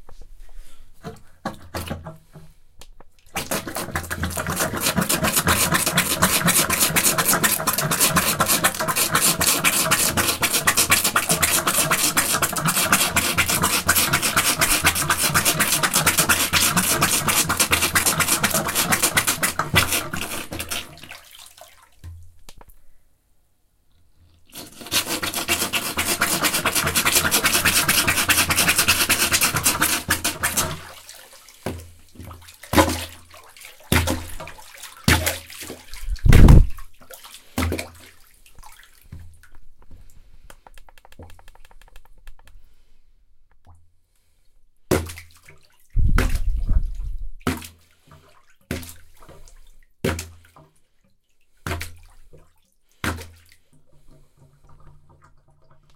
the sound of plunger in a sink with water